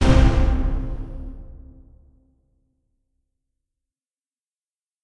Orchestra Stab
An amazing and great sounding orchestra hit for your music!
Samples and instruments created with the soundfont SGM V2.01.
Software = Audacity. Sequencing device: Midi creating software.